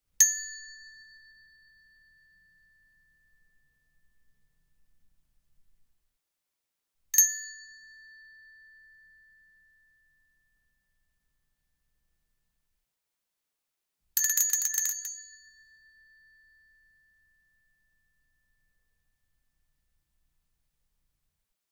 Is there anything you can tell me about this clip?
chromatic handbells 12 tones a1
Chromatic handbells 12 tones. A tone.
Normalized to -3dB.